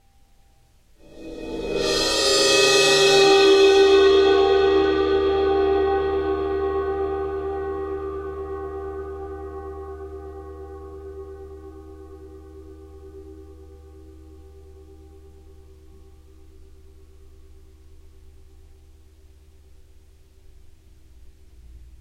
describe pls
Cymbal Swell 013
bowed cymbal swells
rare 18" Zildjian EAK crash ride
clips are cut from track with no fade-in/out. July 21St 2015 high noon in NYC during very hot-feeling 88º with high low-level ozone and abusive humidity of 74%.